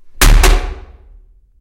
closing one toilet lid
Closing a toilet lid.
bath, bathroom, campus-upf, lid, seat, toilet, UPF-CS14, WC